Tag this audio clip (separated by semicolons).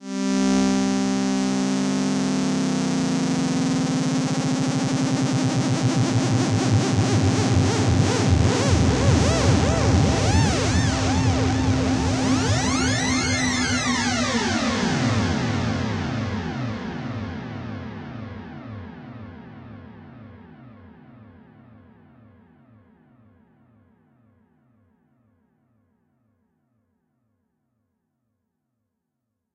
electronic; oscillators; synth1